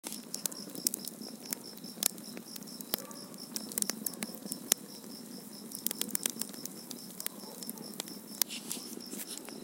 Fire crackling the wood and carbon waiting his moment to cook argentinian roast.
Location: Nono, Cordoba, Argentina.
burning, fire